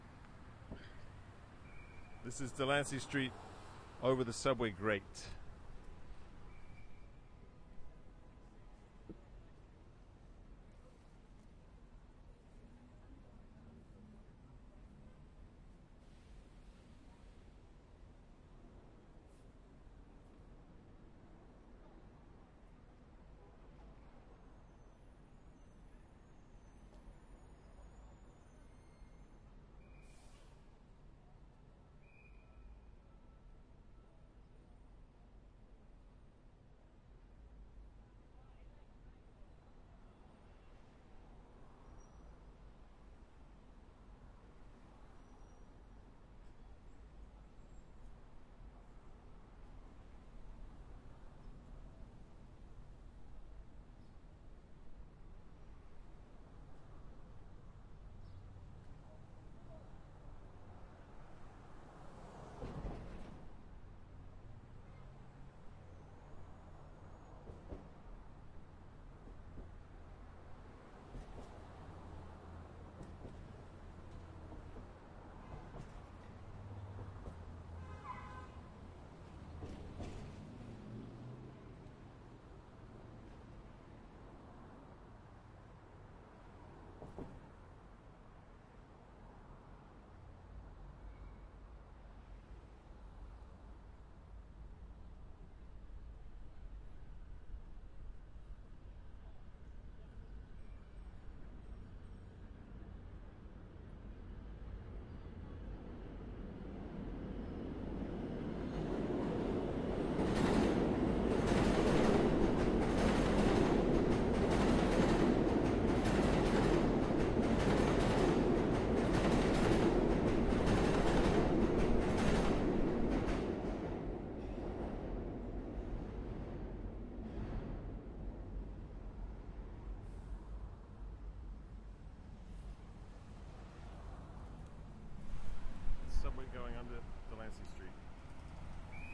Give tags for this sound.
street; subway; newyork; nyc

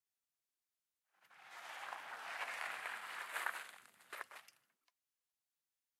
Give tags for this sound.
rider; jump; park; approach; chain; street; downhill; ride; bike; bicycle; wheel; terrestrial; whirr; click; pedaling; freewheel

